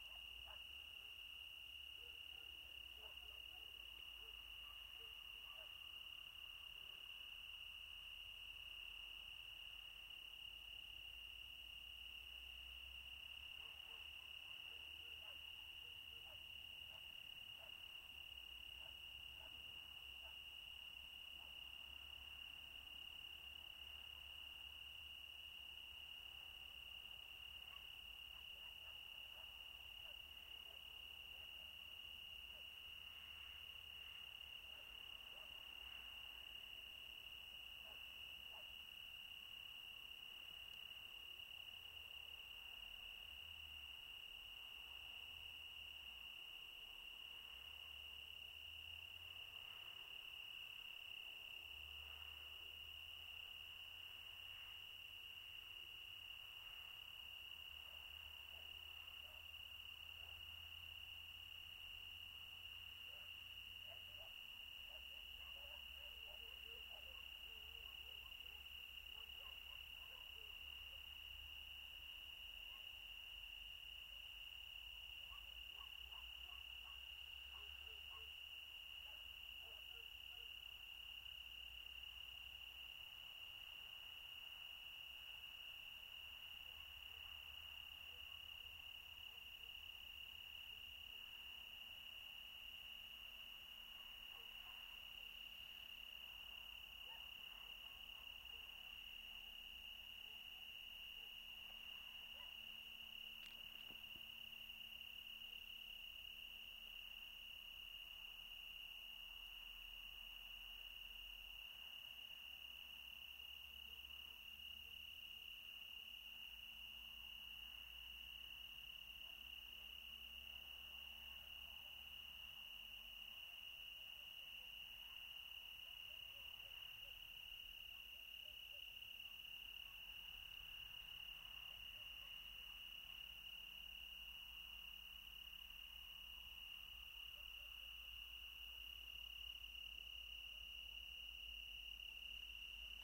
calenzana crickets
Standing in a field full of crickets near Calvi. There are some distant dogs barking and the occasional car, but mostly just crickets. Loops really nicely for extended listening.
Recorded with The Sound Professional binaural mics into Zoom H4.